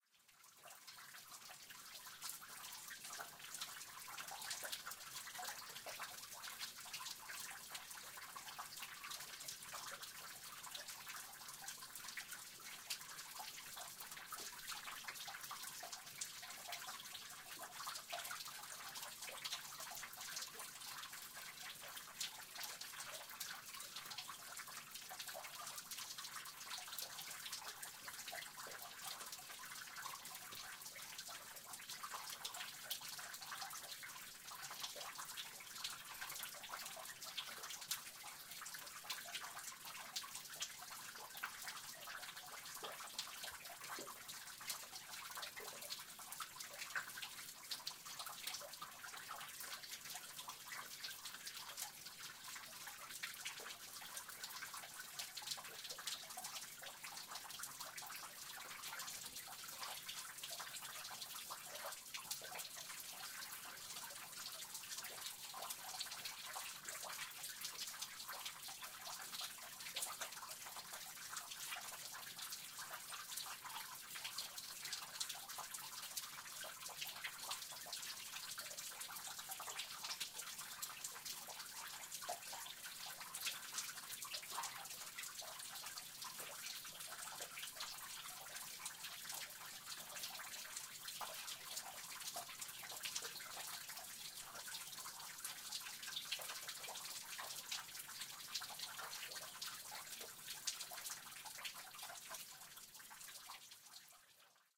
I found this wonderful 4 foot high waterfall in a small canyon in the Shawnee National Forest in southern Illinois. The cool splashing of the rivulet as it spilled out onto a catch-pool was enhanced by the echo as the sound bounced off the 3-sided grotto. Recorded on one of the hottest days of August 2011 using my Rode NTG-2 shotgun mic into Zoom H4N recorder.
Summer, cool, creek, echo, field-recording, limeston, peaceful, rock, splashing